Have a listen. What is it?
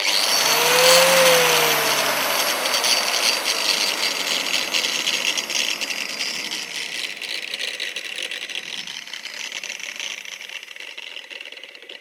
Fein angle grinder 125mm (electric) turned on, running freely and slowing down.